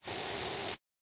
Spray Can Hiss 2
Aerosol Spray Hiss Sound
Aerosol, Can, Hiss, Spray